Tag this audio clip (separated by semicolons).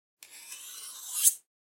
blade; cook; cooking; couteau; cuisine; food; kitchen; knife; knife-slash; slash; slice; slicing